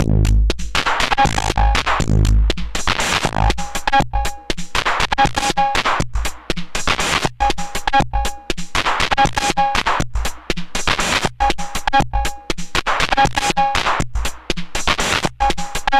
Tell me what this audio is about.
Distorted karaoke machine loop 1
Playing the yocto drum machine through a busted karaoke machine I found at a flea market. Very distorted sound, should loop perfectly, 8 bars. First loop
808, bass, beat, circuitbending, distortion, diy, drum, electronic, electronics, glitch, karaoke, lofi, loop, machine, mod, noise, percussion, yocto